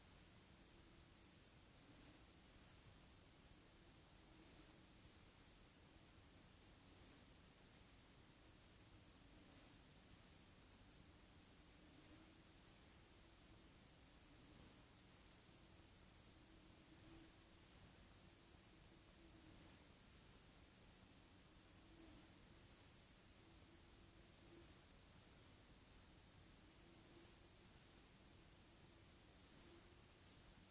Tape hiss
Sound output from playback of an old blank/empty type 1 audio tape that has an undulating pattern of noise. The volume hasn't been adjusted, so this is true to the amount of noise present in a tape recording.
blank, cassette-tape, empty, hiss, noise, spooky, static, tape, tape-recording